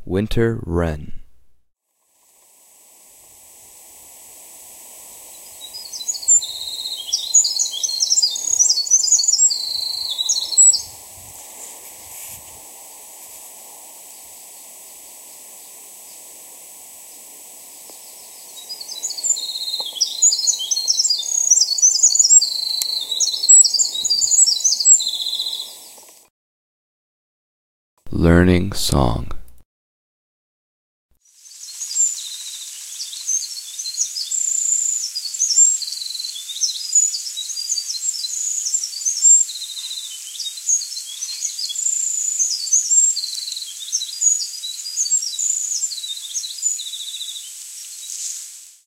Winter Wrens are highly energetic birds. A few recordings here show their songs with slight variation between them. They are long, high-pitched, staccato songs that are very musical.
bird, birds, bird-song, call, nature, north-america, song, wild